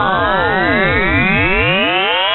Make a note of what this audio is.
Granular file synthesised in granulab and subjected to intense scrutiny in my imaginary quality control facility...
free, granny, granular, rising, sample, sound, ufo, voice